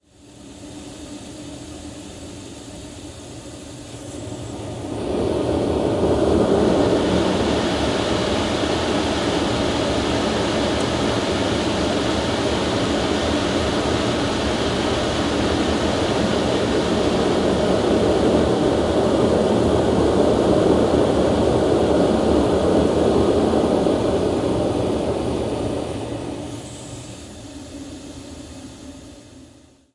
Close up recording of wood dust extractor vent being manually opened and closed.